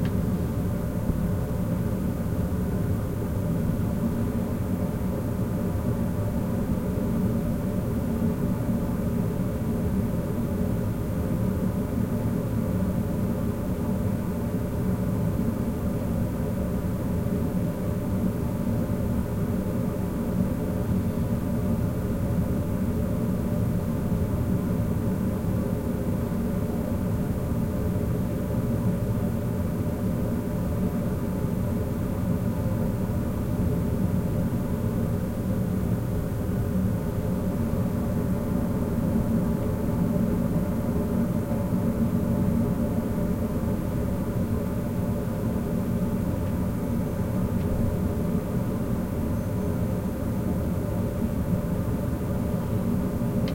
air vent 1
recording of my air vent